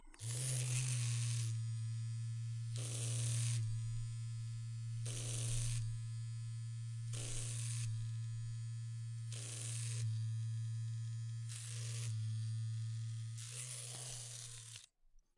Electric razor 13 - battery driven razorblade on beard
A recording of an electric razor (see title for specific type of razor).
Recorded on july 19th 2018 with a RØDE NT2-A.